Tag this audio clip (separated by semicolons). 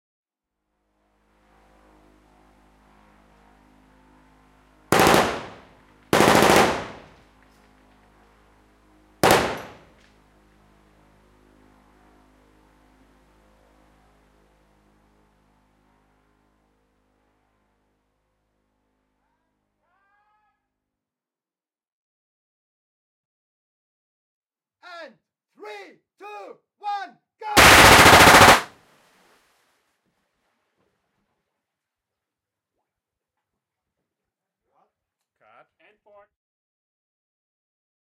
machine; gun